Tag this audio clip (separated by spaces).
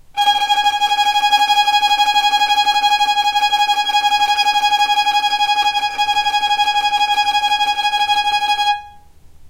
violin tremolo